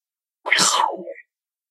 A human noise with some added effects. Recorded using a laptop mic.